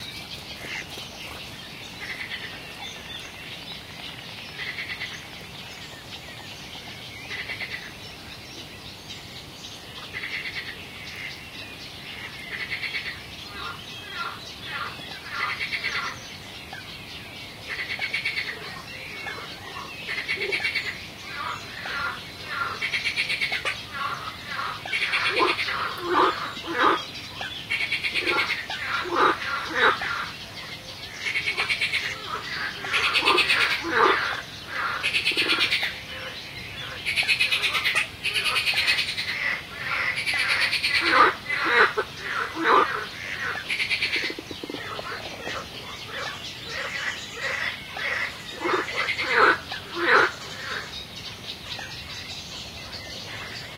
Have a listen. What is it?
Frogs croaking in a beaver creek Northern Switzerland.
Astbury, birds, Croak, croaking, field-recording, frog, frogs, marsh, nature, night, pond, swamp, toads